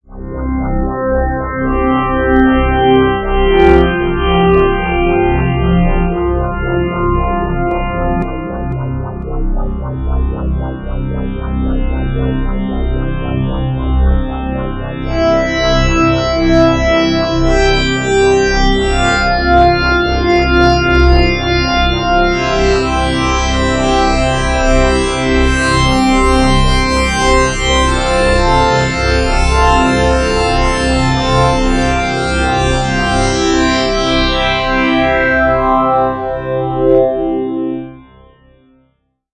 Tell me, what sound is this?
Another Harmonics loop/melody done with Audacity's Harmonics Generator.
harmonics, music